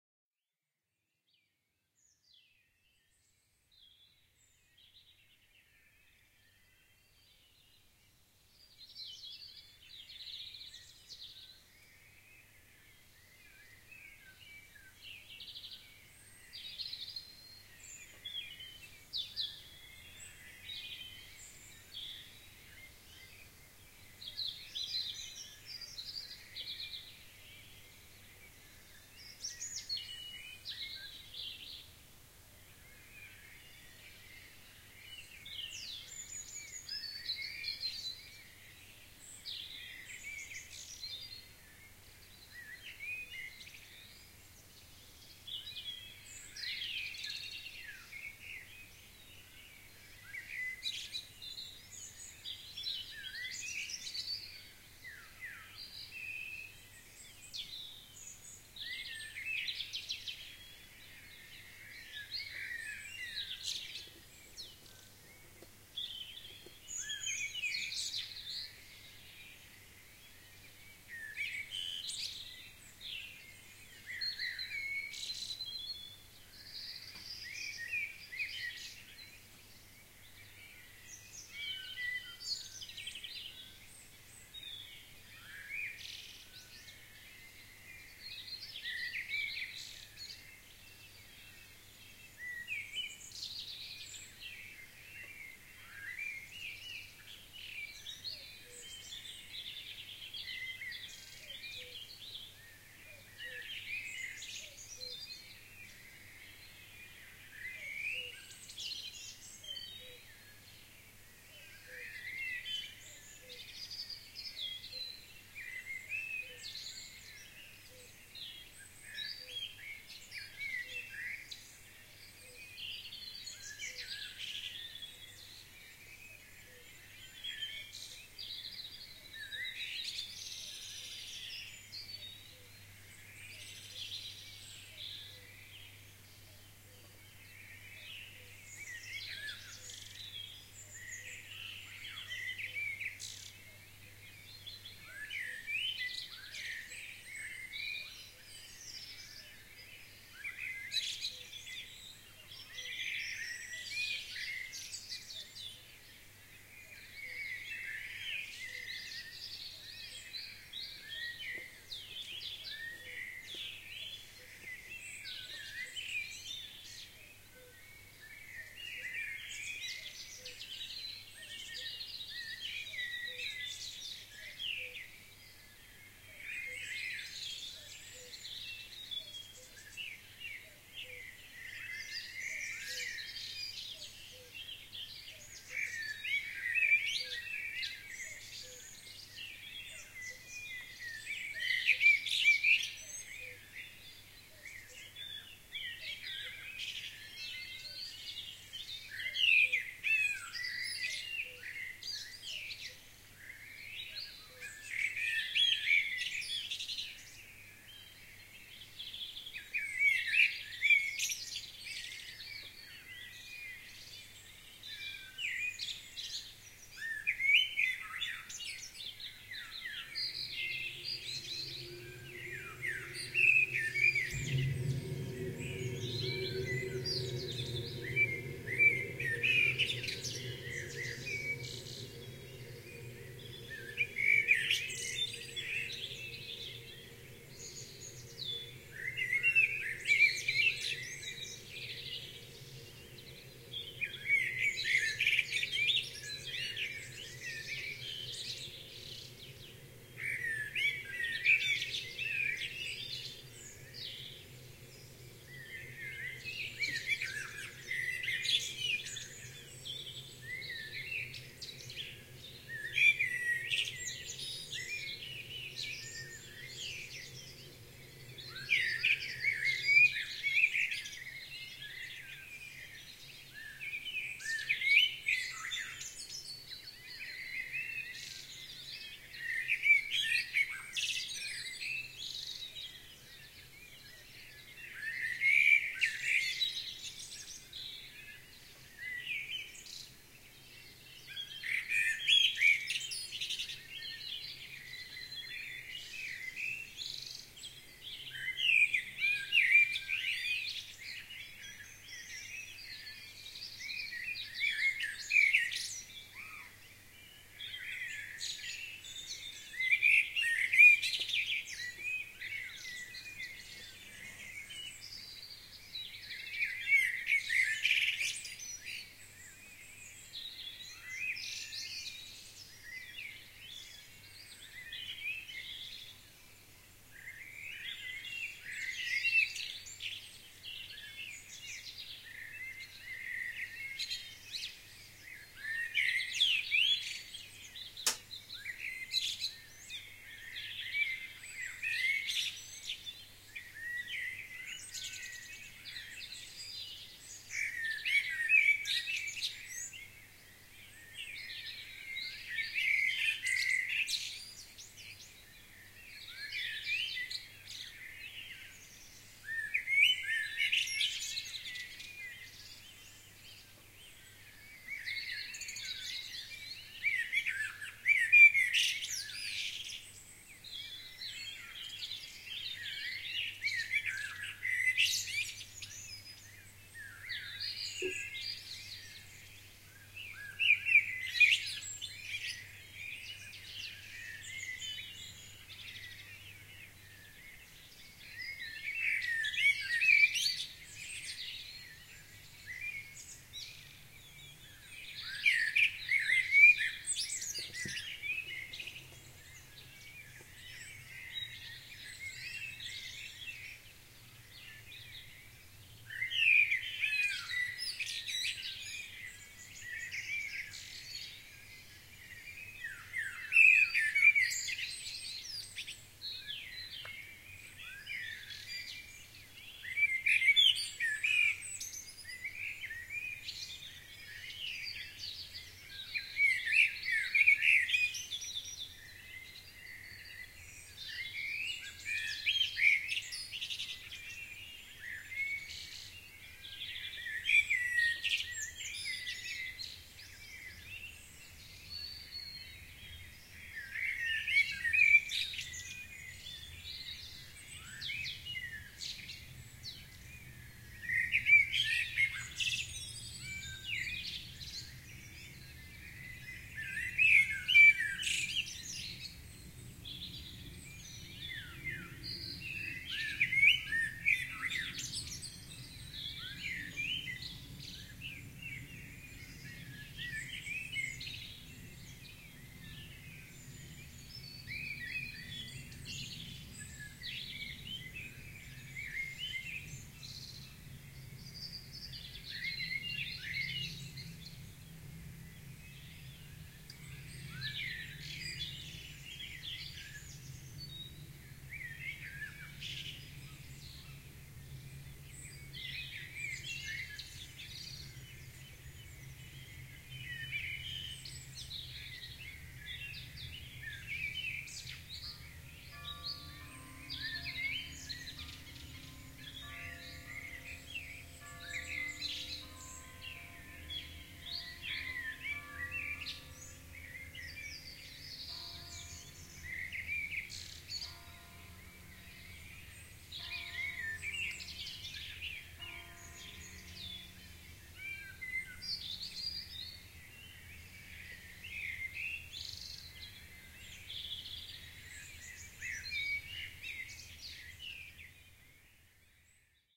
4am on 7th June 2015 in the small rural town of Charbury in the Cotswolds, UK.
At about 3 min 45 sec my neighbour's central heating clicks in for a minute.
An Aeroplane can be heard in the distance from 7 min 30 sec
Church Bells strike 4am at 8 min 26 sec.
Recorded with a stereo pair of AKG C1000s mics into a MOTU8 audio interface. No EQ or other processing.